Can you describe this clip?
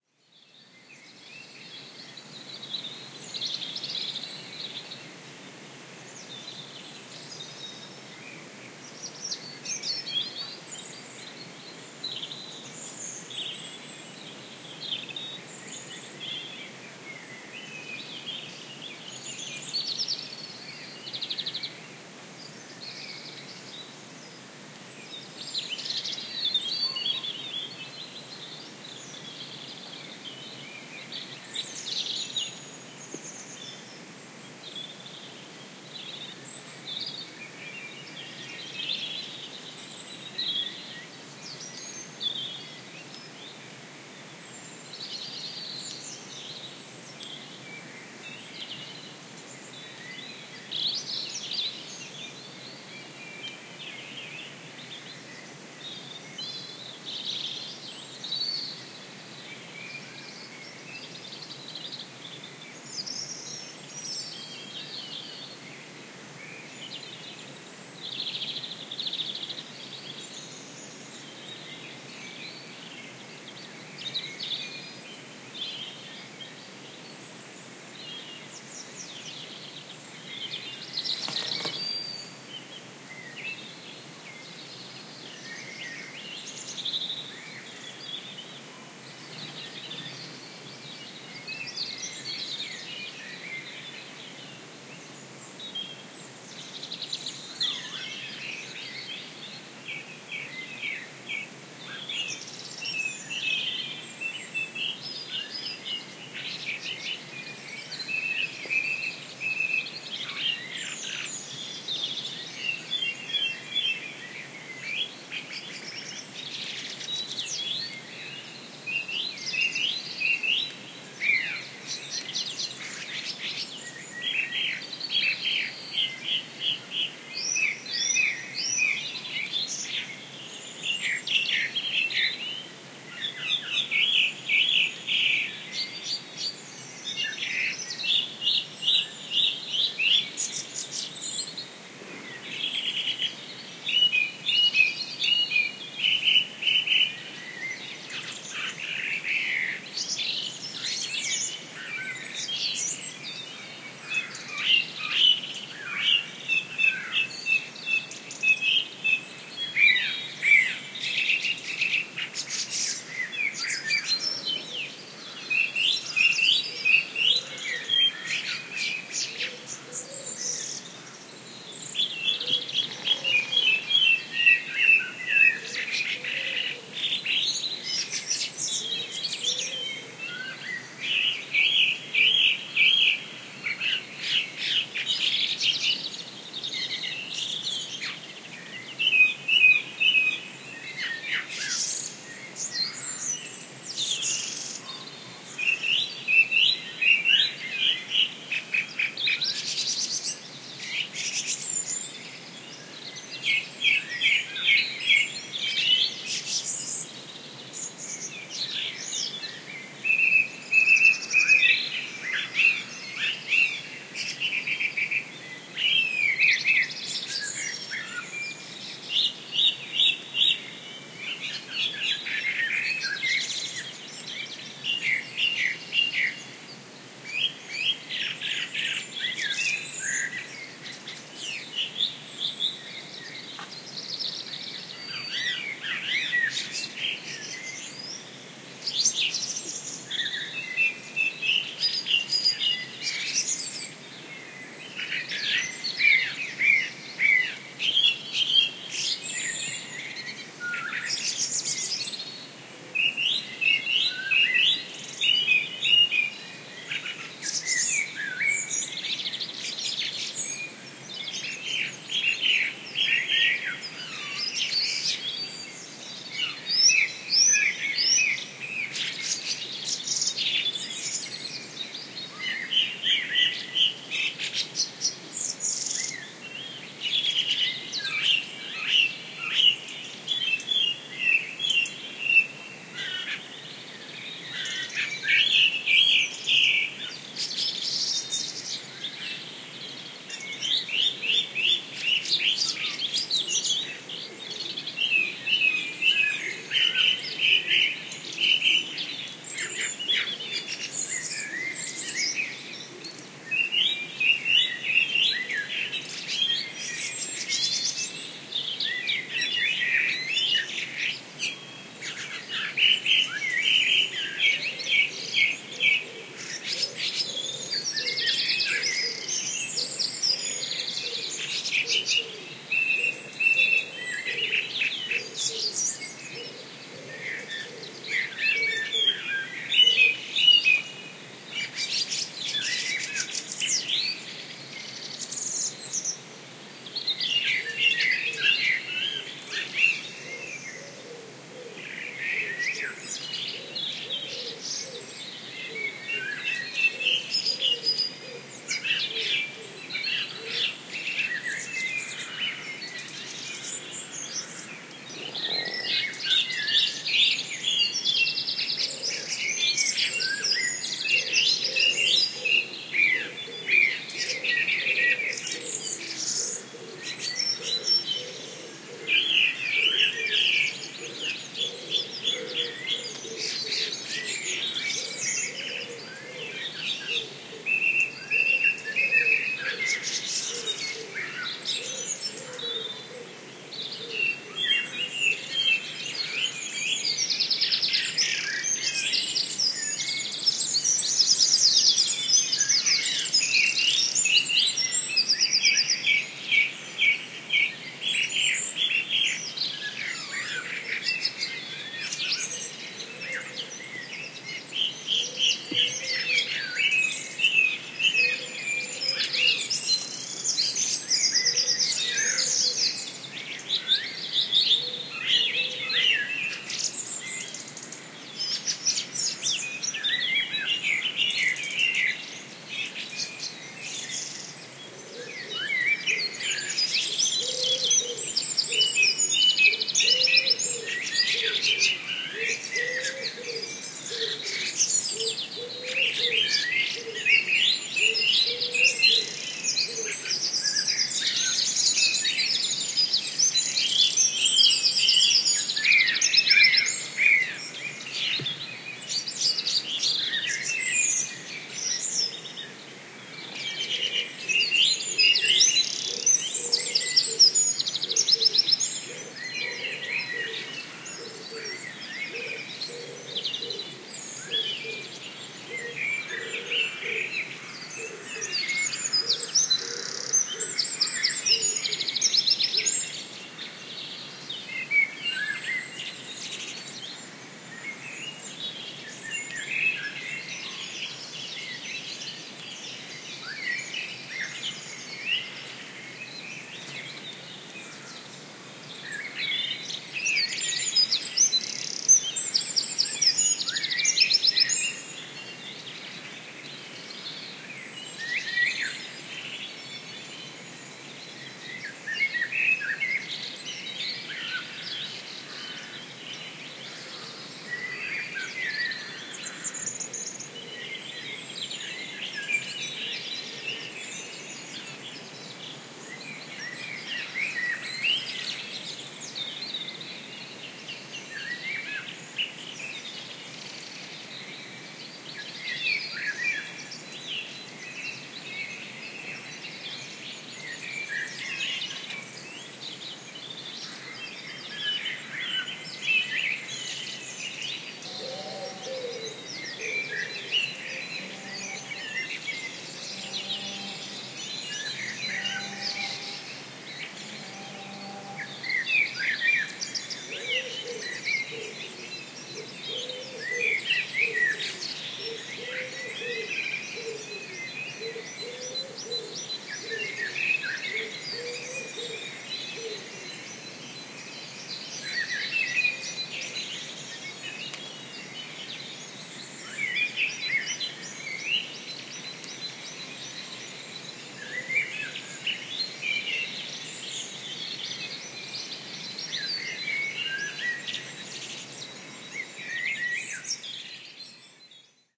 Dawn chorus in Gloucestershire, recorded May 2016. Open countryside, but near a hedge